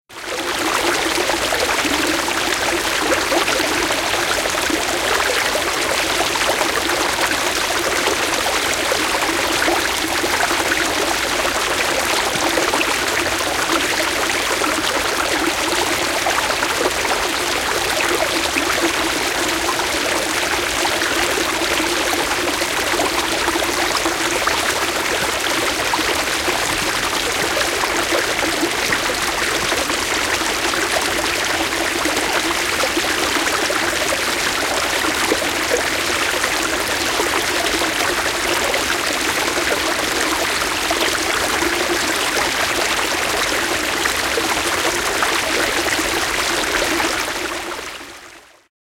Puro solisee, kevät / Bigger brook, stream, gurgling and babbling brightly nearby
Isohko vuoripuro, veden heleää solinaa. Lähiääni.
Paikka/Place: Islanti / Iceland
Aika/Date: 1980
Nature, Solina, Luonto, Spring, Brook, Stream, Field-Recording, Water, Soundfx, Yle, Yleisradio, Puro, Finnish-Broadcasting-Company, Vesi, Tehosteet